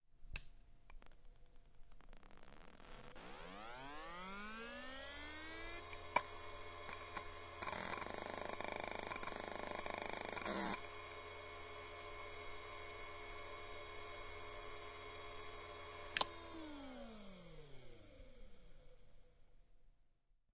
I have a lot of old hard drives, some of which are still working and others are damaged. My project is collect the sounds produced during start-up. To do this I use a digital recorder ZOOM H4N using the two incorporated microphones and two 'diy'ed contact microphones additionally. This here is the sound of a Western Digital Caviar 32500 working fine.
device, electronic, hard, sound